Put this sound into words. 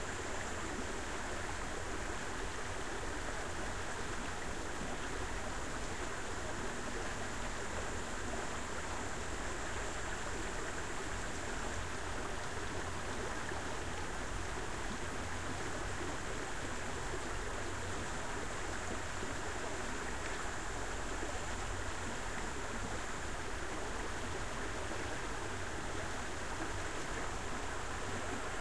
20070623 161757 plantage beekje
A small water stream running trough a coffee plantage.
- Recorded with iPod with iTalk internal mic.
water, stream, indonesia